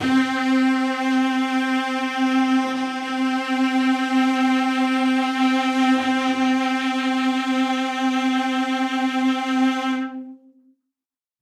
One-shot from Versilian Studios Chamber Orchestra 2: Community Edition sampling project.
Instrument family: Strings
Instrument: Cello Section
Articulation: vibrato sustain
Note: C4
Midi note: 60
Midi velocity (center): 95
Microphone: 2x Rode NT1-A spaced pair, 1 Royer R-101.
Performer: Cristobal Cruz-Garcia, Addy Harris, Parker Ousley
c4, cello, cello-section, midi-note-60, midi-velocity-95, multisample, single-note, strings, vibrato-sustain, vsco-2